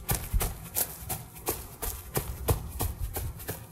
light jog on grass